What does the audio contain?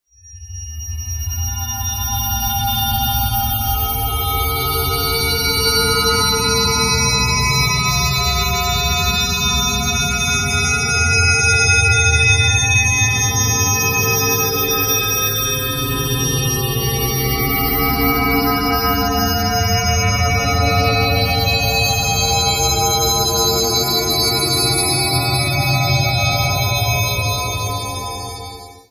Metallic angel drone
drone, metallic, sci-fi